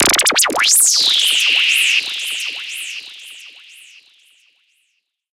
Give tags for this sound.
cool; effects

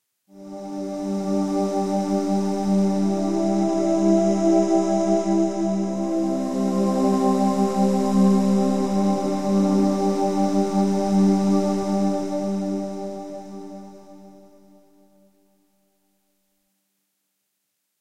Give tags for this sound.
movie; drone; film; cinematic; sci-fi; music; background; ambience; suspense; soundscape; mood; thrill; background-sound; deep; horror; ambient; drama; pad; atmosphere; trailer; hollywood; dramatic; scary; space; thiller; dark

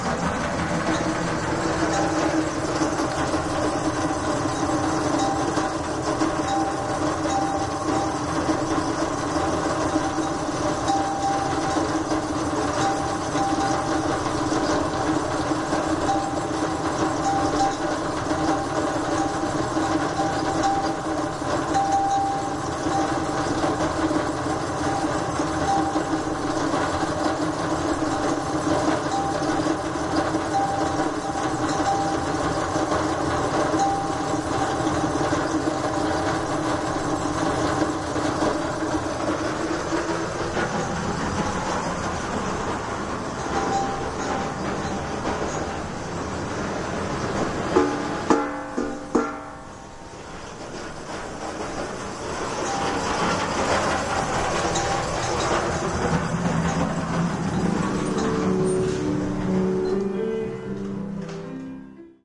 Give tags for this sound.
furnace; metal; rattling

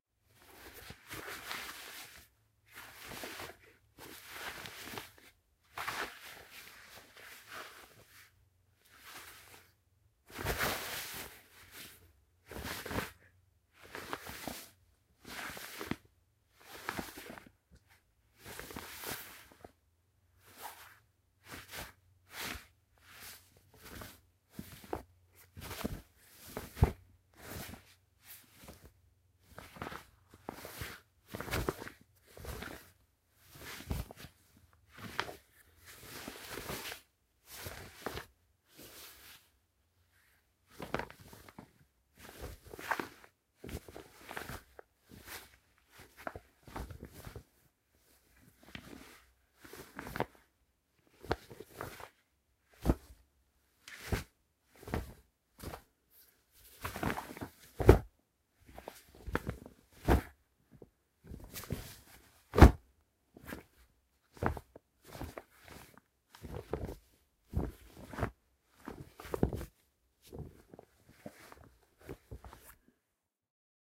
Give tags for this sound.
close; cloth; clothes; clothing; fabric; material; moving; swish; textile

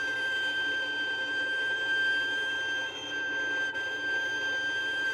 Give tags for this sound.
long; shrill; sustain; squeak; violin; pitched; note; high

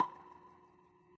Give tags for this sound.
synth; speaker; analog; cabinet; drums; reverb

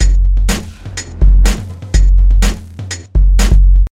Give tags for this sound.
dance; beat; funk; big; breaks

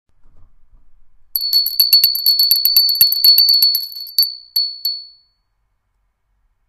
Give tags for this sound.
Bell; ring; ringing

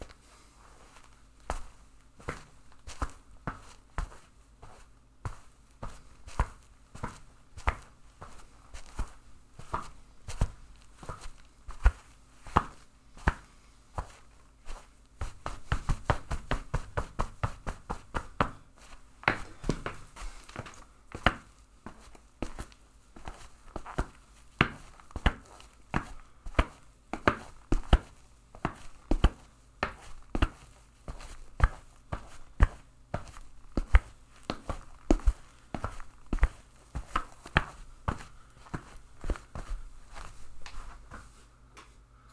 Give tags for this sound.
hardfloor; tile

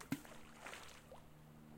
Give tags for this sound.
nature; splash

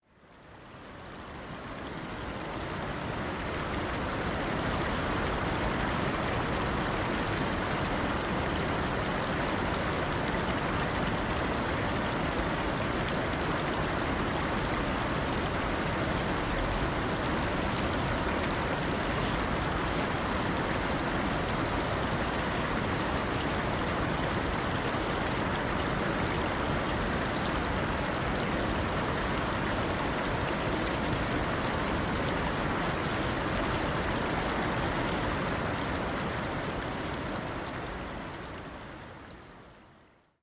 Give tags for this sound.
flow; gurgle; liquid; river; rushing; water

